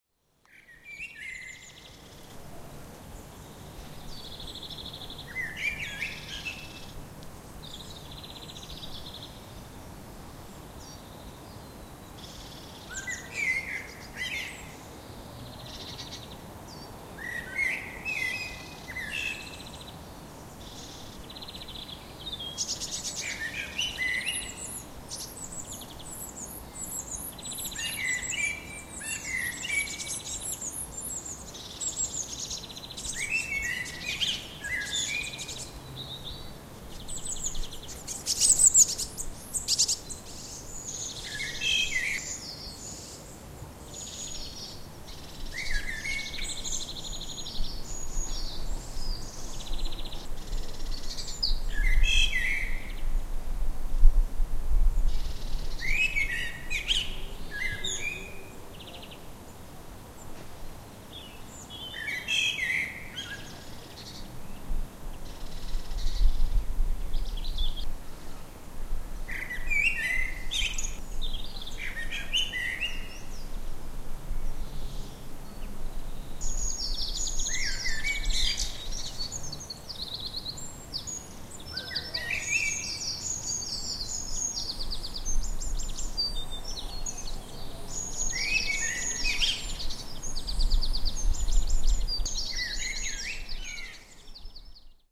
Evening birdsong recorded near Blackford Pond in Edinburgh.